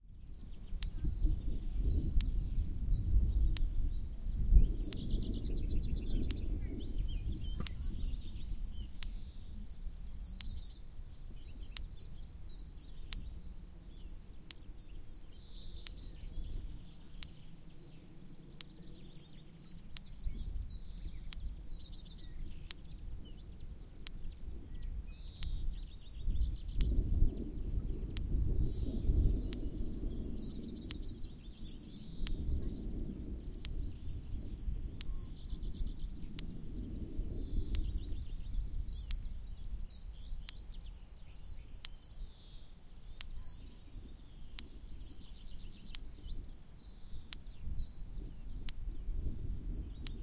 electric fence and field ambience 2
Recording of an electric fence clicking in rural Denmark.